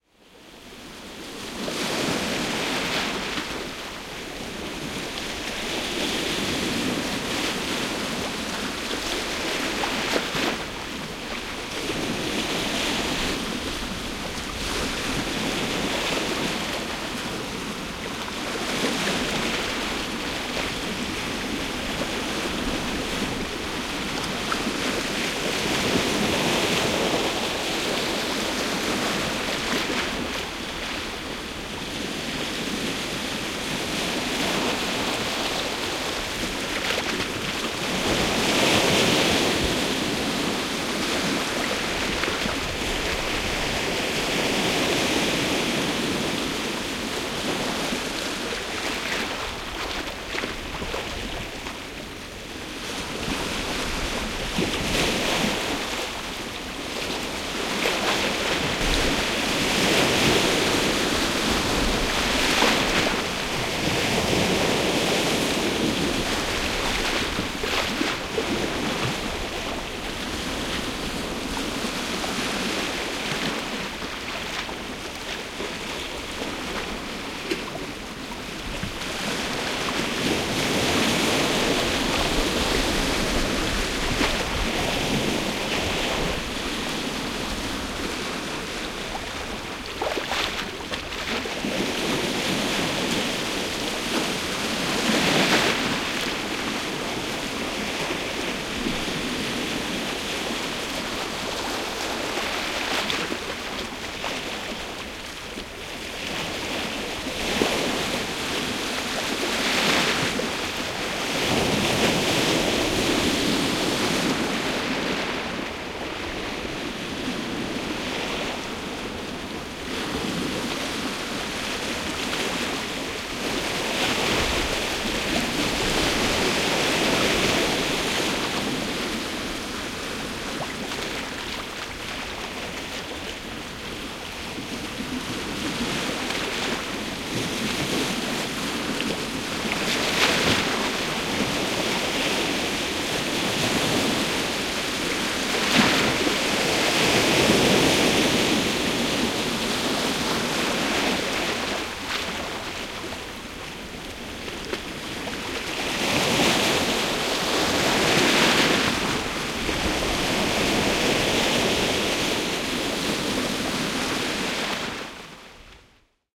Voimakkaat meren aallot loiskivat kallioilla Suomenlinnassa.
Paikka/Place: Suomi / Finland / Helsinki, Suomenlinna
Aika/Date: 10.09.1971